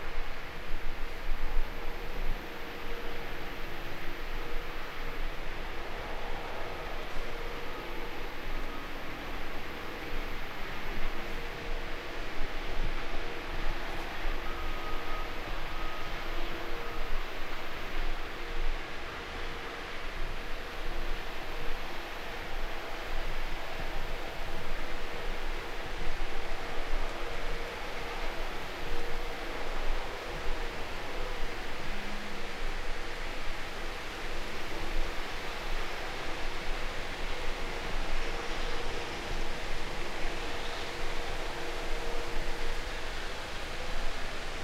quarry near by
soundscape behind a little sand hill 50 meters near to the quarry. It' s interesting to listen to the 5 other members of the pack. They're all confined in the same geottaged area, the quarry on river Sabac near Belgrade Serbia. Recorded with Schoeps M/S mikes during the shooting of Nicolas Wagnières's movie "Tranzit". Converted to L/R